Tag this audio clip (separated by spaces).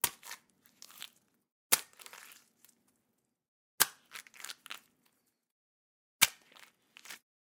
crunch watermelon fruit splash guts blood splatter human slush bones gush impact punch flesh splat